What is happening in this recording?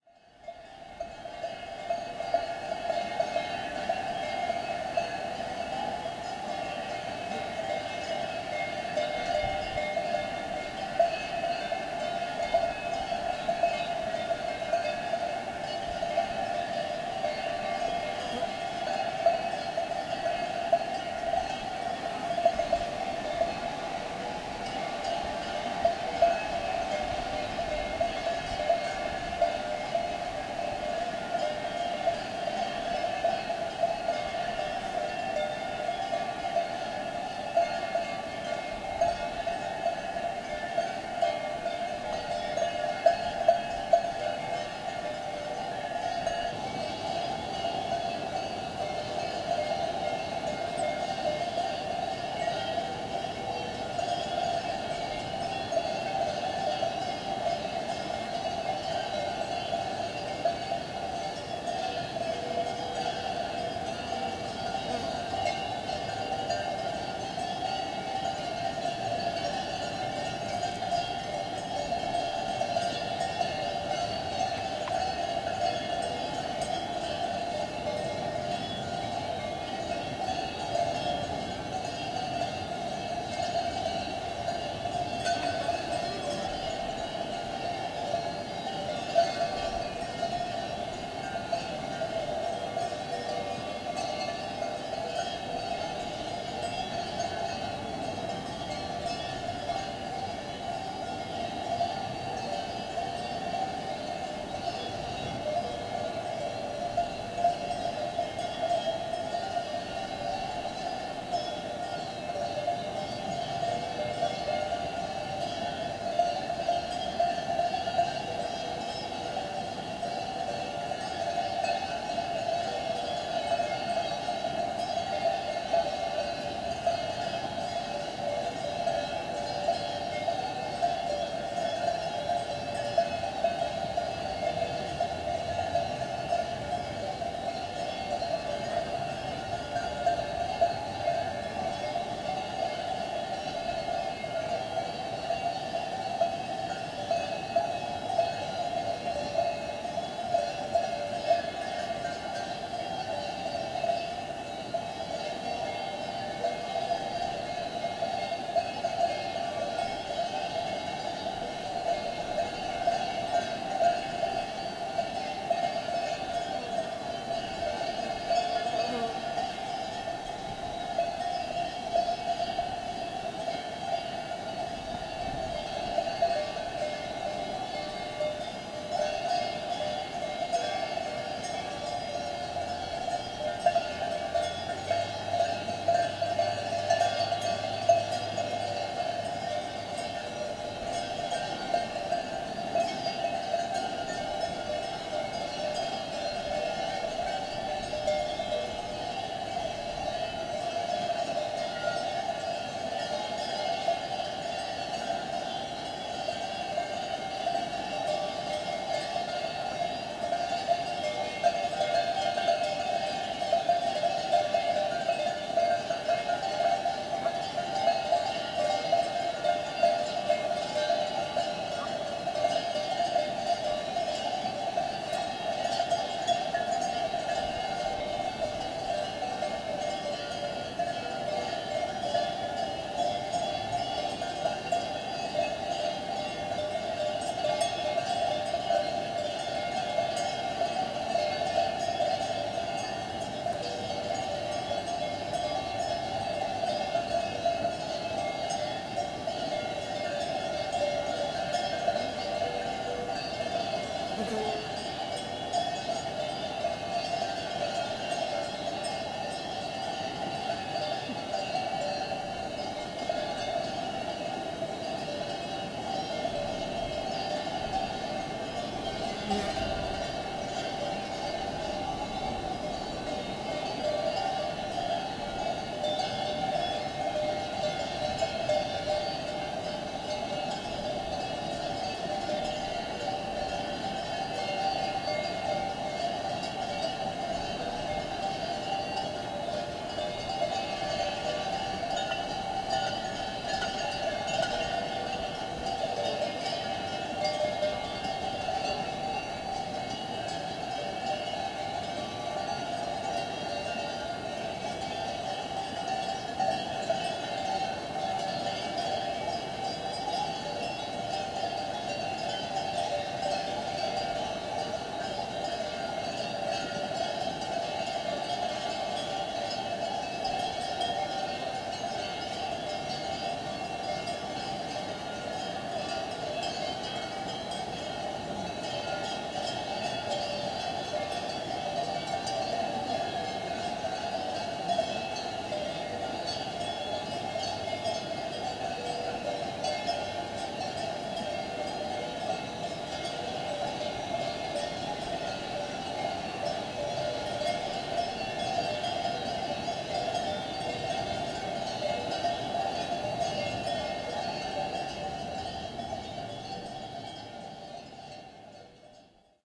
A symphony of cowbells, sounds of cattle grazing in the Carson Iceberg Wilderness, Sierra Nevada Mountains, California